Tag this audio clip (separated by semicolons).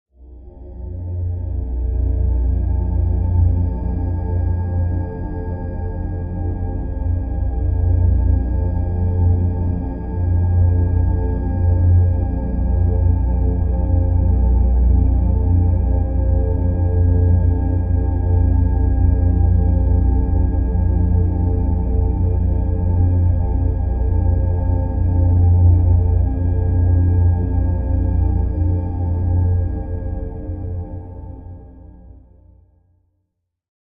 mysterious; atmosphere; tritone; creepy; future; drone; dark; tone; ominous; sci-fi; space; ring